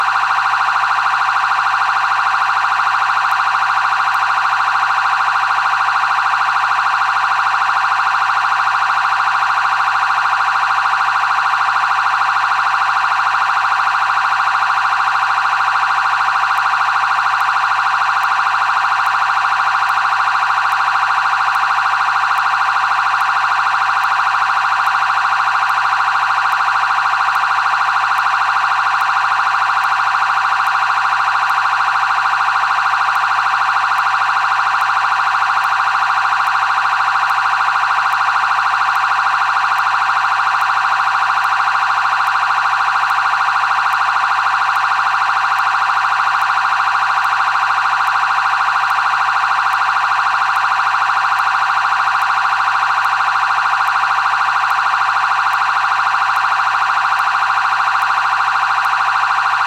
Whelen Piercer
Piercer sound miked directly from the Whelen siren box
car, emergency, fire, police, siren, vehicle, wail, Whelen